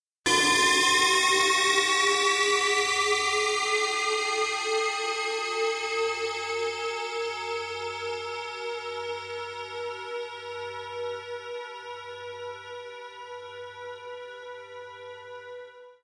Alien Engine 11
A collection of Science Fiction sounds that reflect Alien spacecraft and strange engine noises. The majority of these noises have a rise and fall to them as if taking off and landing. I hope you like these as much as I enjoyed experimenting with them.
Futuristic, Space, UFO